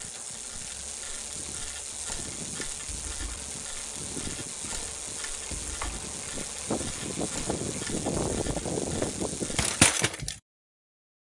Mountain-Bike Wall Crash